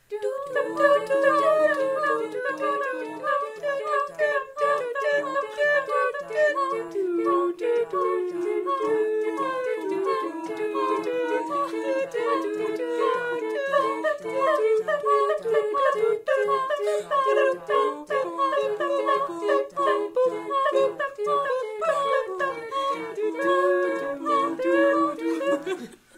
Choral Dissonance
These are recordings of a small female choir group I recorded for a college film back in 2012. I uploaded the cleanest takes I got, room noise is there but isn't terrible.
Vocal, Chorus, Singing, dissonance, silly